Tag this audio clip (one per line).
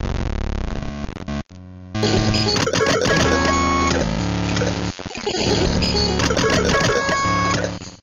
ambient
bent